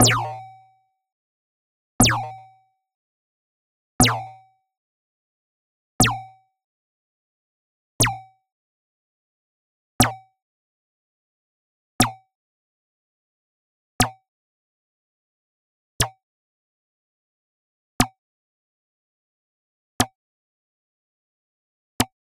Laser pistol shots - different lengths
Laser pistol shot/pulse or some other futuristic machinery thing, whatever. Made using Ableton.
gun, sci-fi, futuristic, weapon, sound, shooting, machine, artillery, pistol, shot, laser, rifle, design, pulse